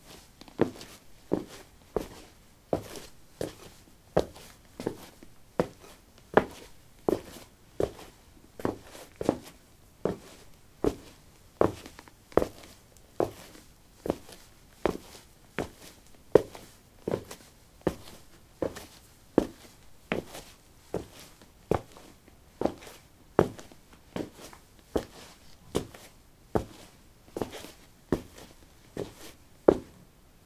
Walking on linoleum: dark shoes. Recorded with a ZOOM H2 in a basement of a house, normalized with Audacity.